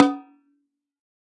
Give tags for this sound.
velocity drum multisample snare 1-shot

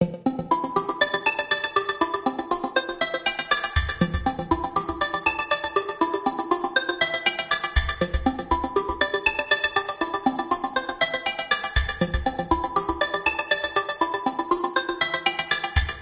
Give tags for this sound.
loop melody music pixel song wonderful

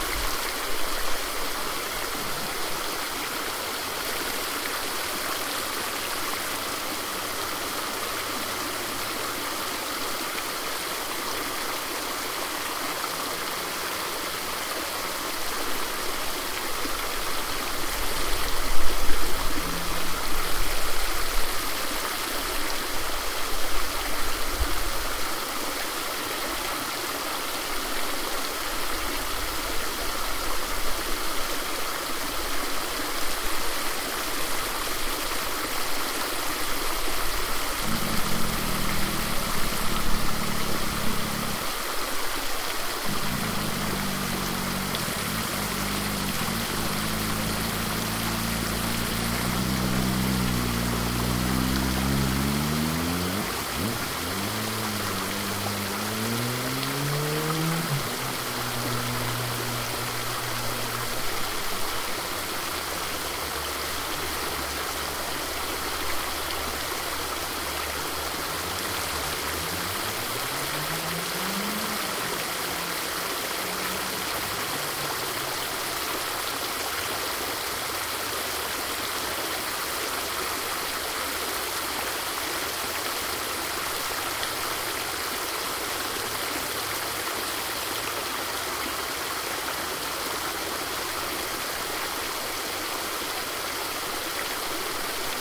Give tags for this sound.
field-recording fountain water

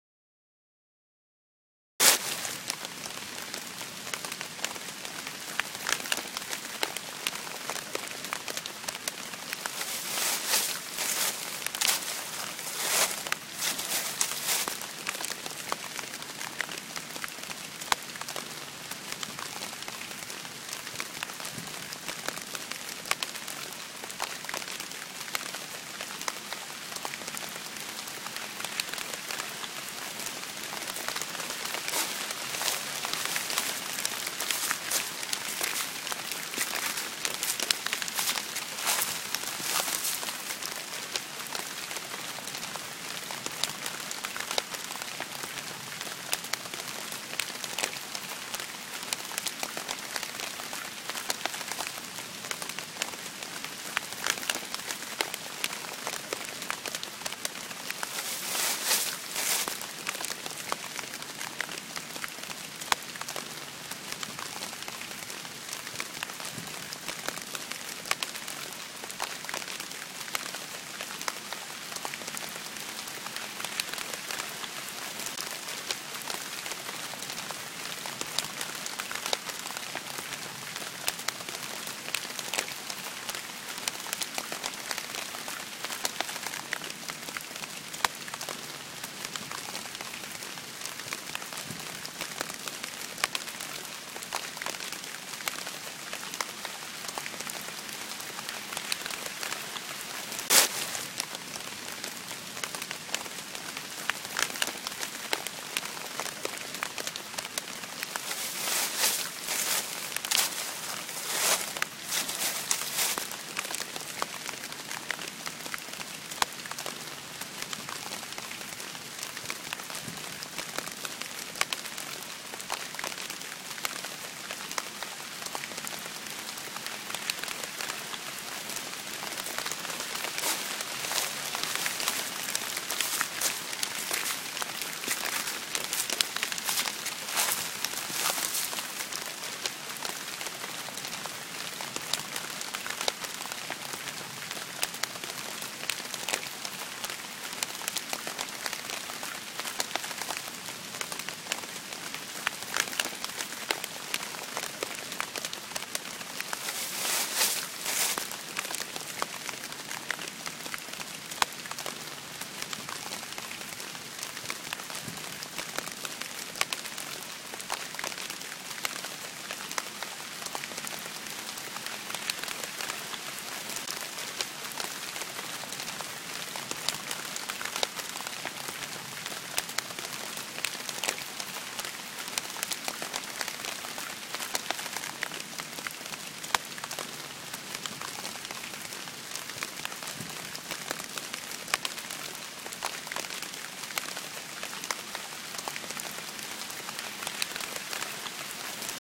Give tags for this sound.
autumn holland leaves october rain

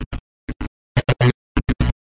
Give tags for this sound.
bass-stabs bass-rhythms bass-stab 125bpm bass synth

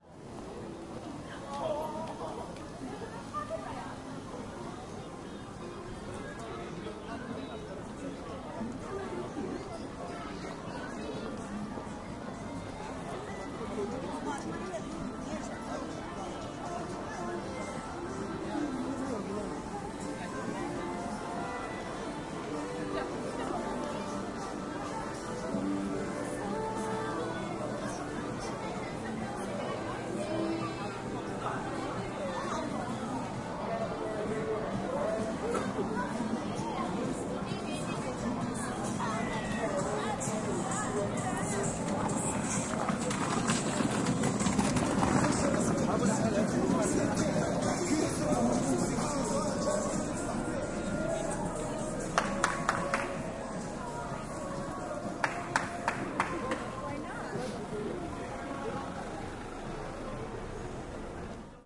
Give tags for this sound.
clap field-recording korea korean seoul voice

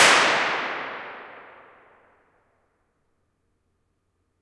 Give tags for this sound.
convolution impulse-response IR

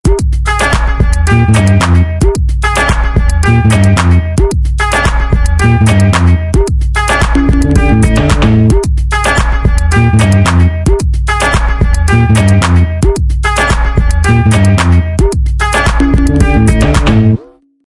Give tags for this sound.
bass drum

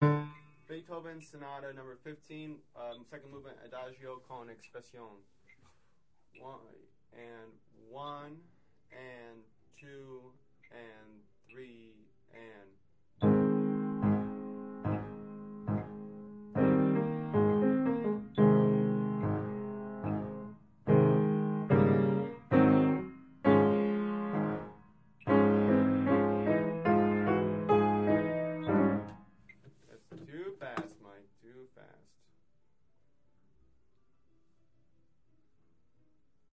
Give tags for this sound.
Piano Practice Logging

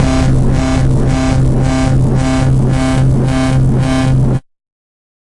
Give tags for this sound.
electronic wah digital Industrial dubstep synth synthetic porn-core 1-shot wobble techno synthesizer bass LFO processed notes

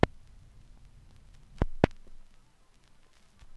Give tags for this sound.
analog glitch loop noise record

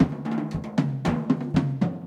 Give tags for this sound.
loop drum

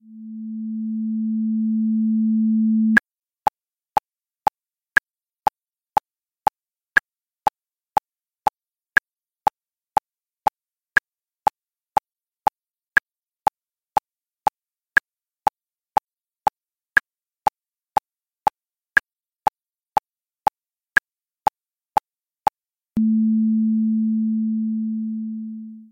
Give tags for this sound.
class beat test

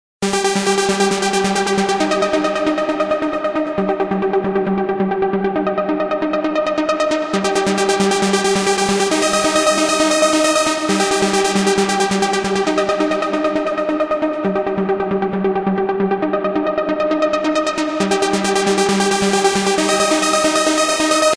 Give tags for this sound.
acid dance electronica synth trance

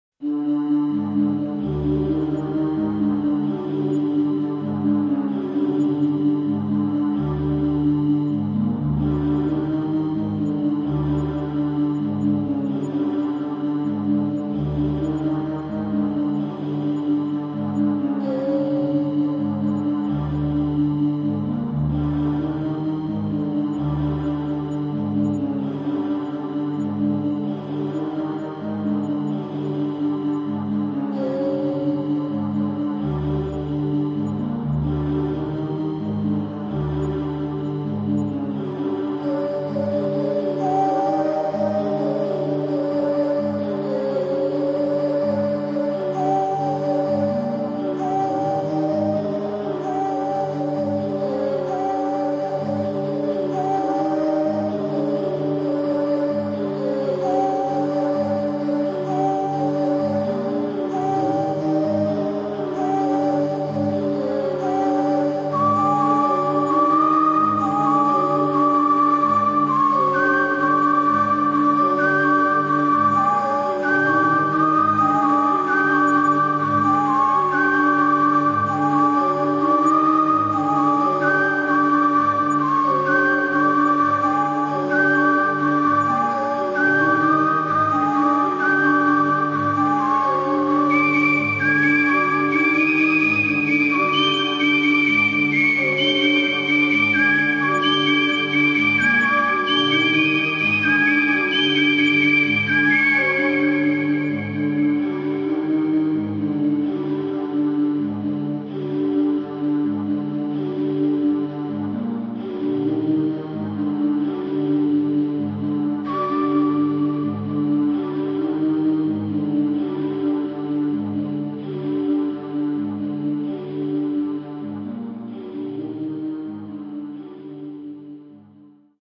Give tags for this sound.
future star SUN wave space sounds radio

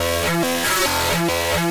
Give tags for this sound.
blazin variety crushed distort guitar bit gritar synth

